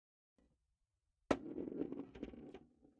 Plastic ball wooden floor 1
A plastic ball falling on a wooden floor
ball, floor, plastic, wooden